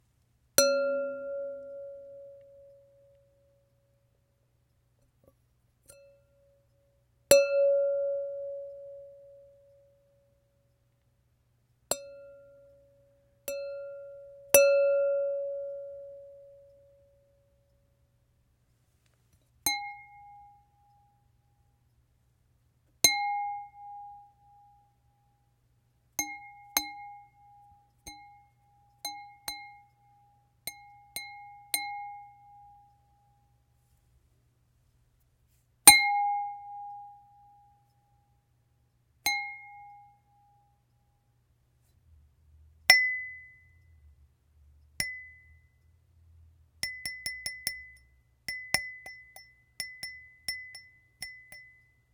Wine glass tinkles
A variety of glass ding tones that could be sampled and played as a musical instrument, or wind chime, or as a simple sound effect.
clink, ding, glass, marimba, music, ping, ting, tone, wine, xylophone